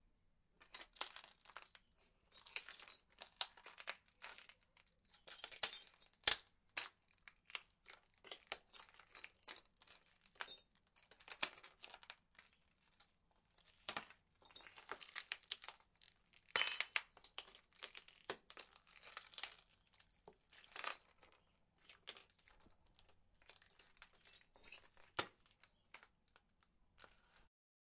Walking on broken glass.
OWI, crunch, broken-glass, glass